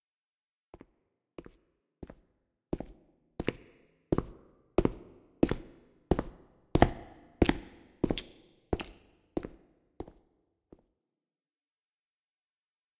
STEPS IN CORRIDOR
Man passing corridor, footsteps, shoes
WALK, STEPS